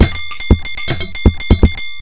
A drum loop I created for a reactable concert in Brussels using kitchen sounds. Recorded with a cheap microphone.
They are dry and unprocessed, to make them sound good you
need a reactable :), or some additional processing.
beat, drum, dry, kitchen, loop, reactable, rhythm, unprocessed